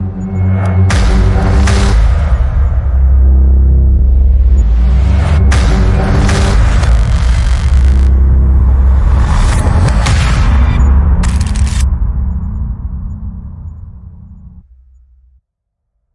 Epic logo for you project.
Hello!